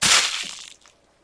A splattering sound I made by quickly crumpling a piece of thin plastic that used to be wrapped around my donut :)
It's a very cool effect.
blood, liquid, plastic, sfx, smash, splat, splatter, violent